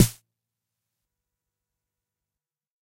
various hits 1 120
Snares from a Jomox Xbase09 recorded with a Millenia STT1
909, drum, jomox, snare, xbase09